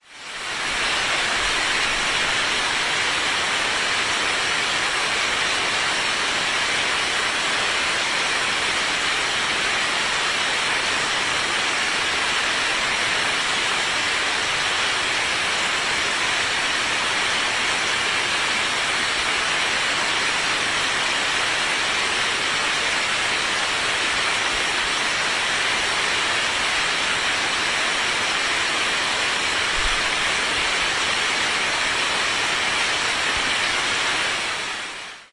sobieszow river drone010710
01.07.2010: about 15.30 on the Karkonoska street in Sobieszow(Jelenia Gora district/Low Silesia in south-west Poland). the drone created by the Wrzosowka river noise.
drone, field-recording, swoosh, river, noise, poland, jelenia-gora, sobieszow